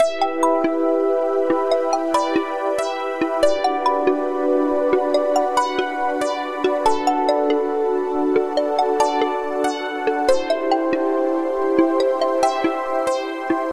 ask silver
A retro 70 bpm synth loop without drums for drunk pop singers and creepy lovers.
music, 140, synth, bpm, loop, electronic, loops, retro, pop, electro, 70, game